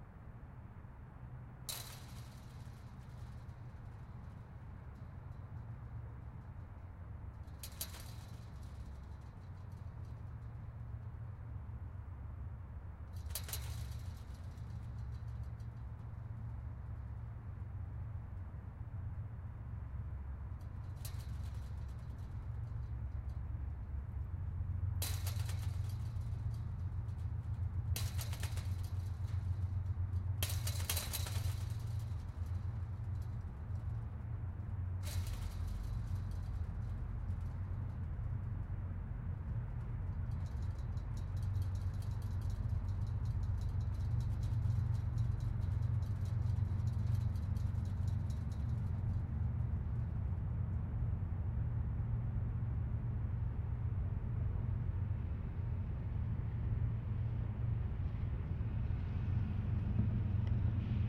Chain fence hit sequence-01.R
sounds of a chain link fence. good for a climbing sfx
chain, link, fence, climbing, over